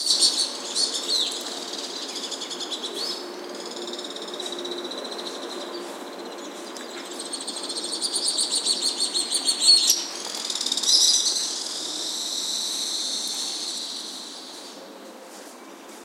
20160817 alpine.swift.03
Screechings from a group of Alpine swifts, with city noise in background. Recorded in downtown Saluzzo (Piamonte, N Italy), using PCM-M10 recorder with internal mics.